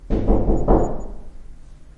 Knocking sound with little post production
knocking, wood, shy, strong, cavern, door